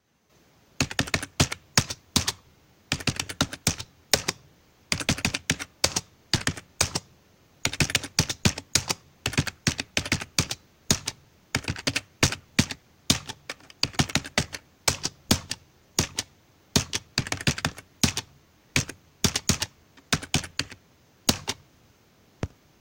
Keyboard typing numbers
computer, keyboard, numbers, typing